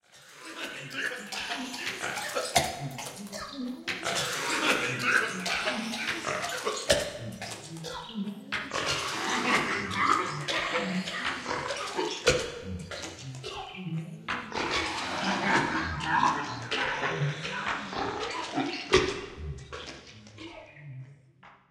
My own voice mouth cough variations on different tracks with effects, time stretching and pitching. I like sounds a kind of dirty when you don't know what it is. Some people thinks that its very dirty! Me, I like strange sounds that happens after editing!